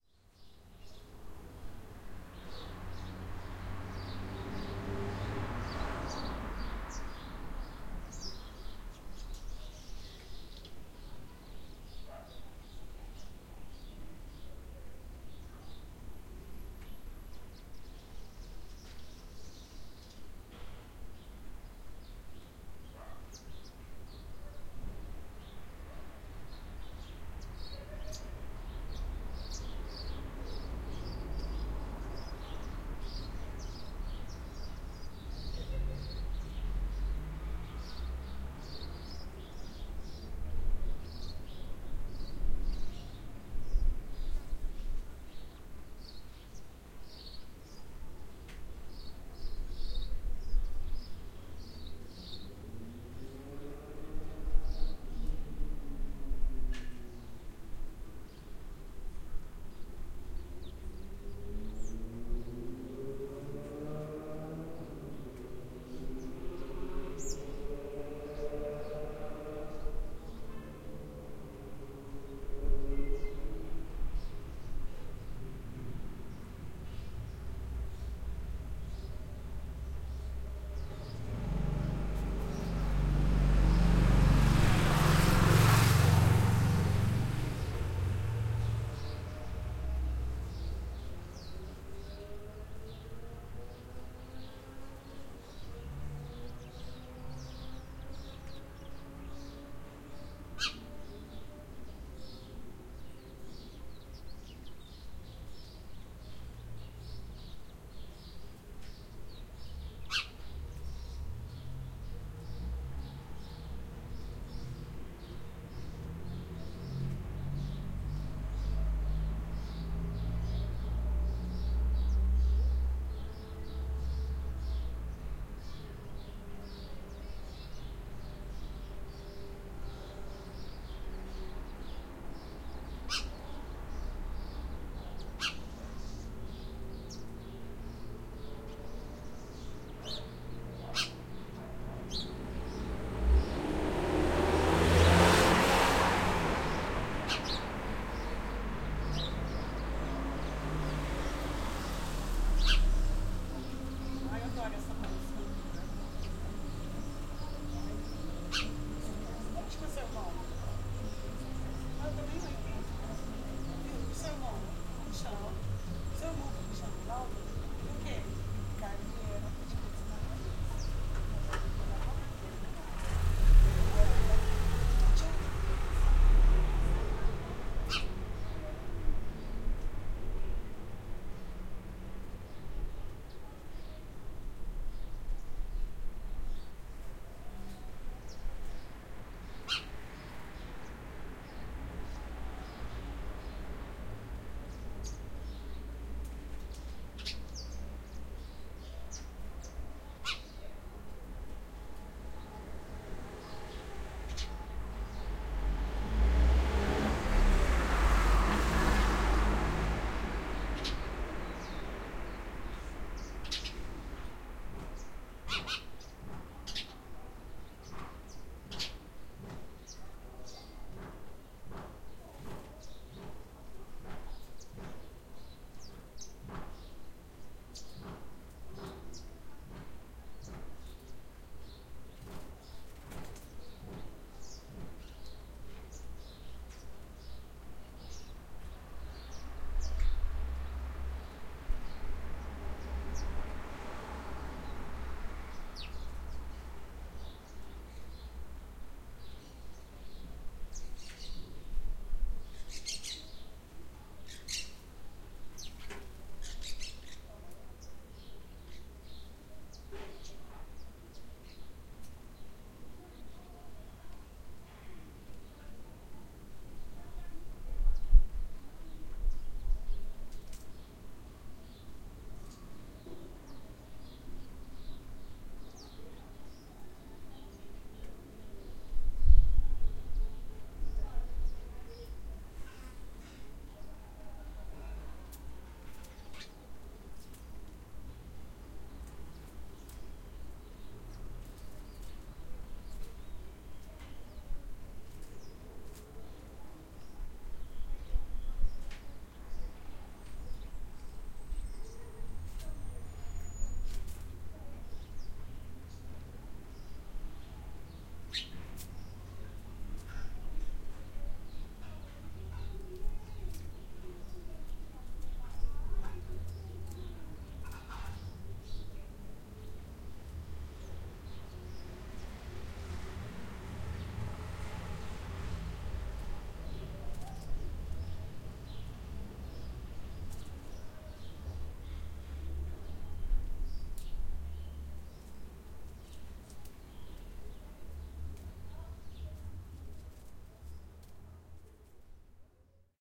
Quiet suburban morning (Brazil)
This is a recording I did of my street in a suburban neighborhood of a small Brazilian city. In the middle of the recording, a car stops and two women talk for a little moment.
ambient, morning, quiet